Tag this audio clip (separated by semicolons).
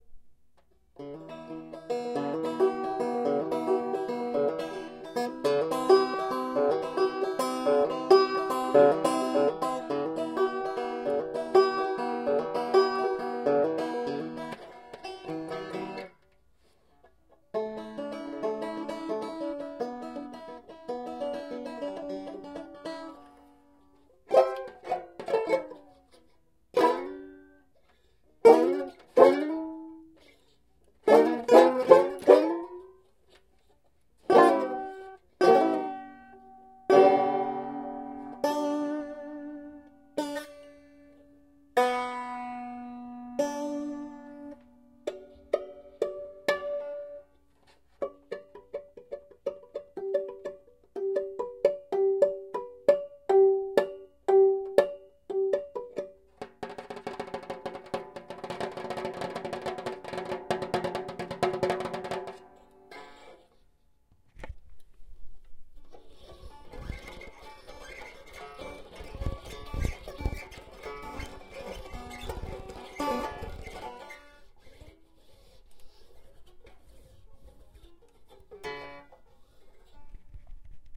Banjo; instrument